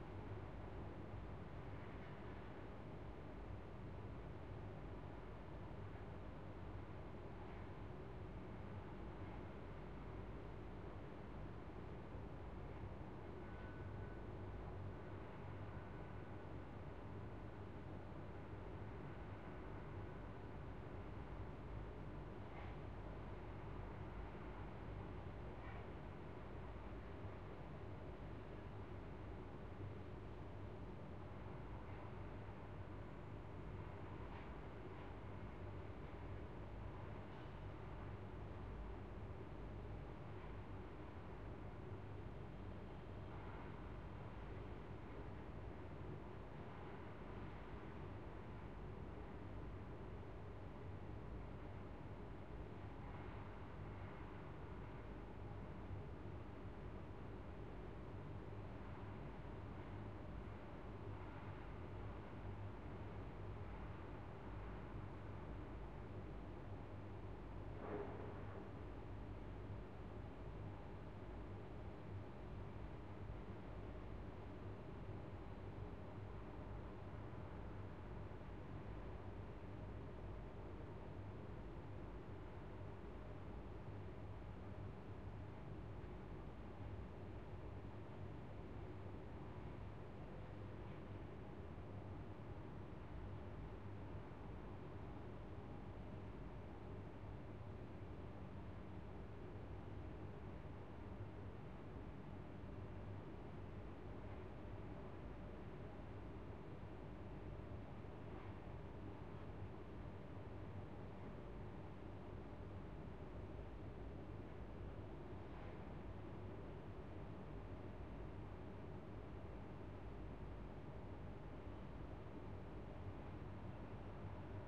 Room Tone Office Industrial Ambience 09
Tone, Ambience, Indoors, Room, Office, Industrial